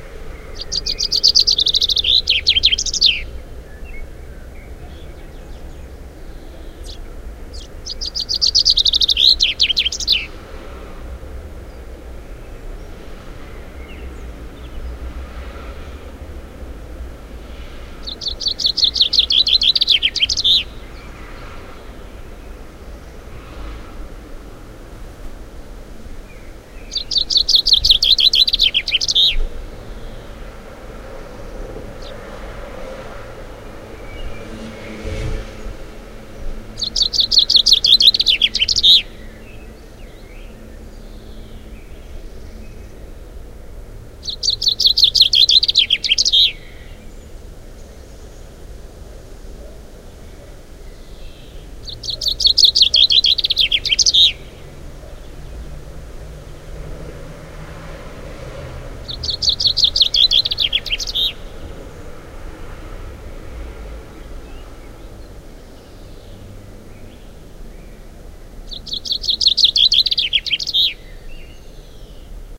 Chaffinch on the top of a antenna in a suburb of Cologne, Germany. Sony Datrecorder, Vivanco EM35.